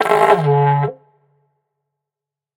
Dino Call 14
short didgeridoo "shot" with some reverb. enjoy
didgeridoo, effect, oneshot, short, organic, deep, sfx